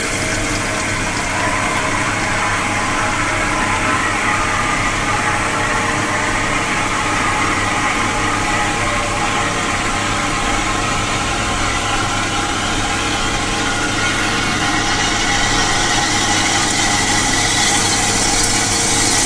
waterflush at my work